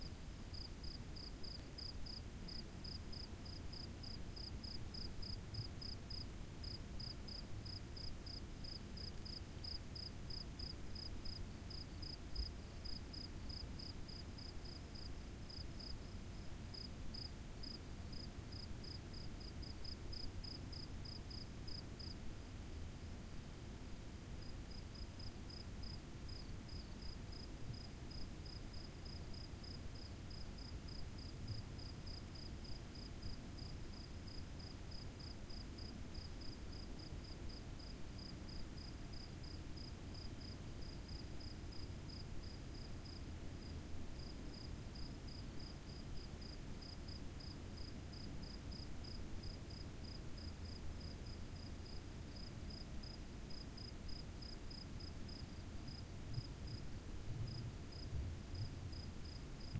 Cricket singing in a dune at night. Recorded in Olhão, Portugal, 2017, using a Zoom H1. Minimal processing for reducing bass rumble and increasing gain.
summer, insects, field-recording, night, ambiance, crickets, nature